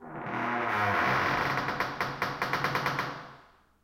Heavy Door Creaking 05
Heavy door groan and creaking in reverberant space. Processed with iZotope RX7.)
Creak, Door, Groan